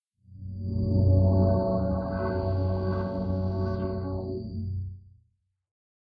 Thoughtful Atmospheric Rapid Intro
think, atmospheric, futuristic, rapid